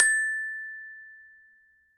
Samples of the small Glockenspiel I started out on as a child.
Have fun!
Recorded with a Zoom H5 and a Rode NT2000.
Edited in Audacity and ocenaudio.
It's always nice to hear what projects you use these sounds for.
multi-sample, metal, note, one-shot, campanelli, sample-pack, sample, percussion, metallophone, multisample, recording, single-note, Glockenspiel